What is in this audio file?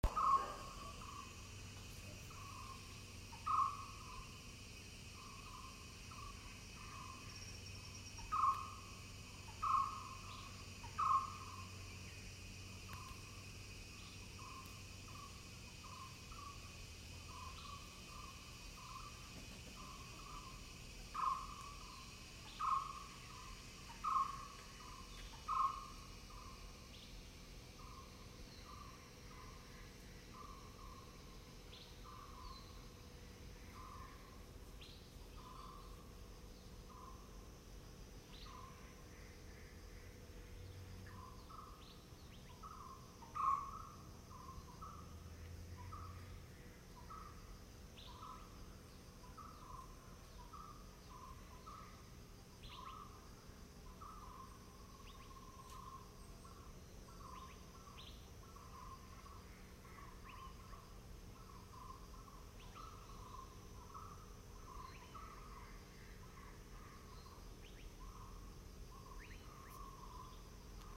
Sound of Jungle
Early morning sound in a coffee estate.
birds, field-recording, forest, jungle